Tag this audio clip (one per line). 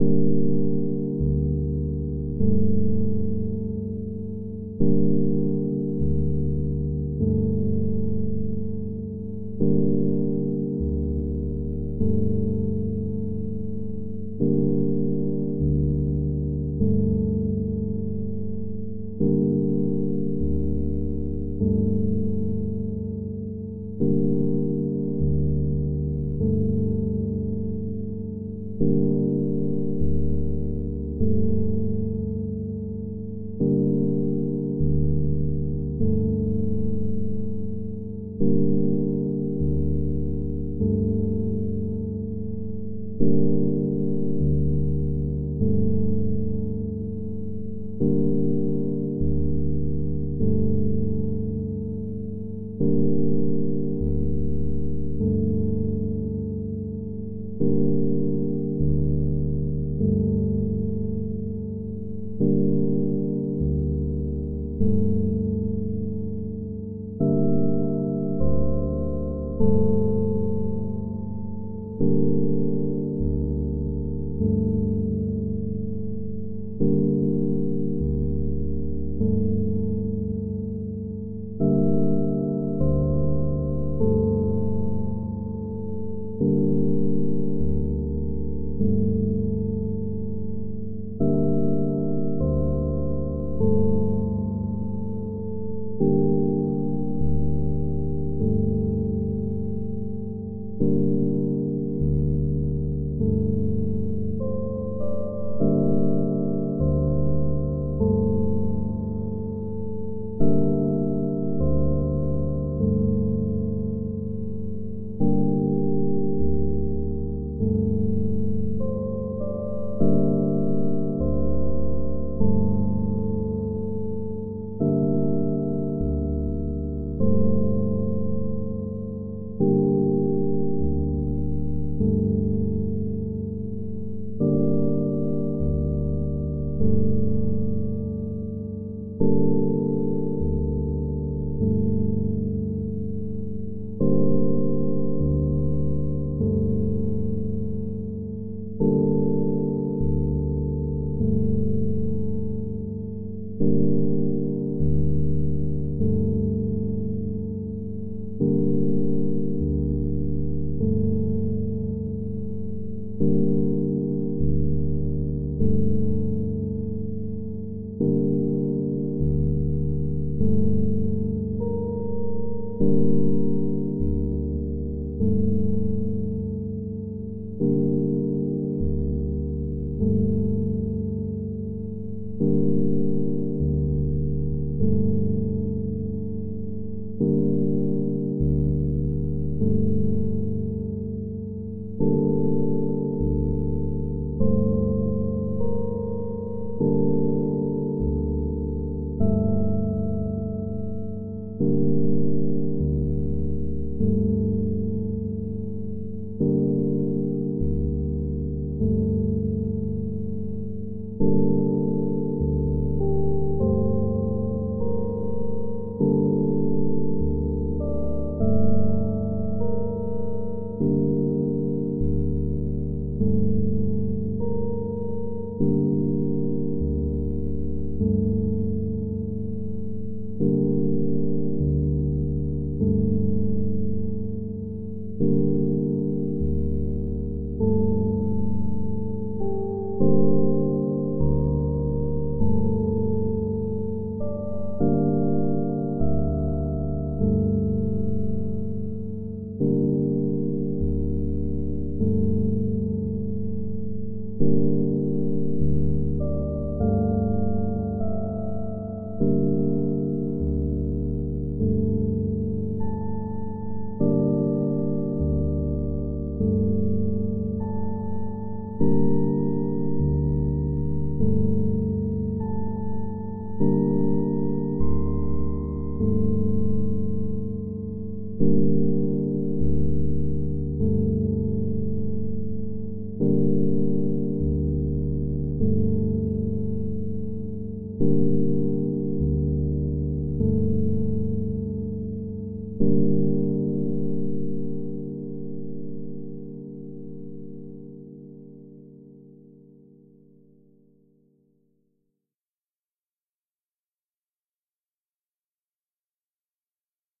soundscape
dark
electric-piano
ambient
deep
musical
bass